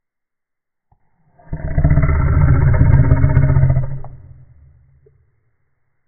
Monster Roar 2
godzilla, dragon, roar, snarl, beast, creature, growl, animal, horror, monster, monster-roar, dinosaur, scary